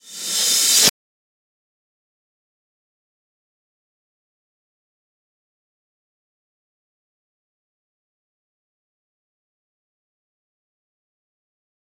Rev Cymb 9
Reverse Cymbal
Digital Zero
metal, echo, cymbal, fx, reverse